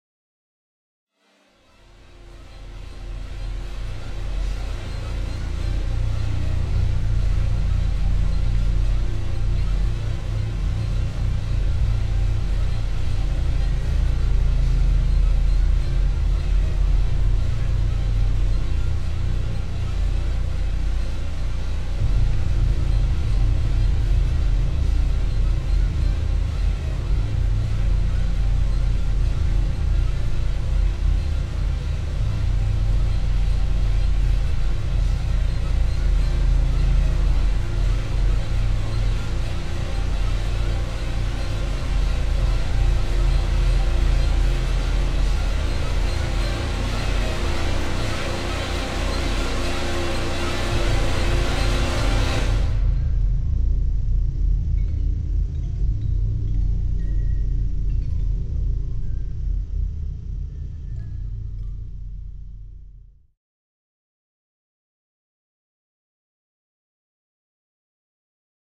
Great for suspense scenes and movies. Increasing.